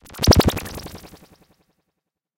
Generic unspecific arftificial space sound effect that can be used for games e.g. for something that disappears
disappear, effect, game, jingle, space